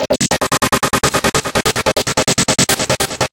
Psy Trance Loop 145 Bpm 08
goa, Loop, Psy, psytrance, Trance